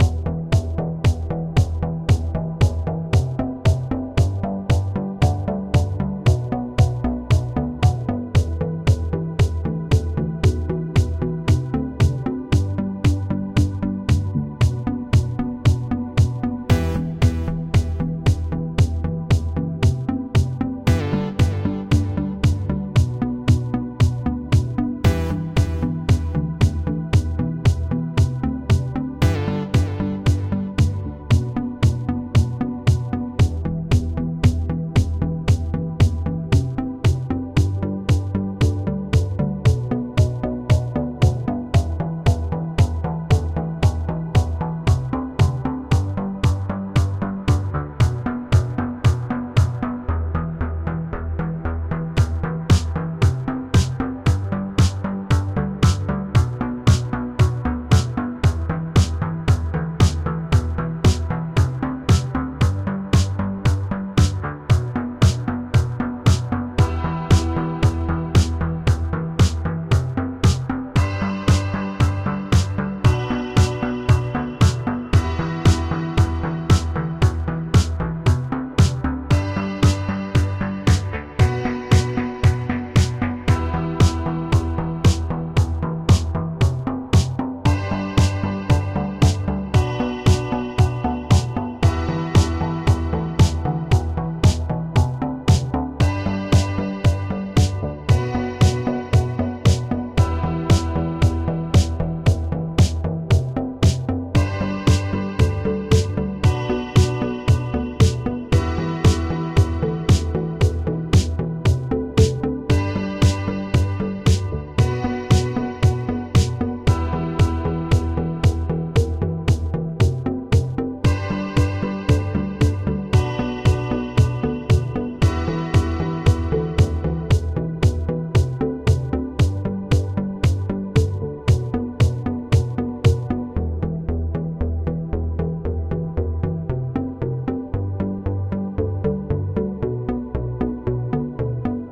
Invisible world - Base track (electro pop).
Synth:Ableton live,Massive,Kontakt.
pop, original, backtrack, music, track, kick, electronic, club, synth, sound, techno, trance, electro, dance, ambient, loop, hat, Base